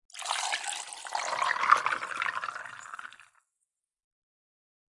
Pouring water into a glass cup full of ice. Recorded with a Sony IC recorder, processed in Fl Studio using Edison.